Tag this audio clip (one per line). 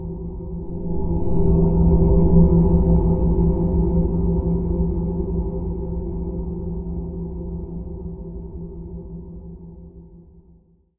ambient
deep-space
drone
long-reverb-tail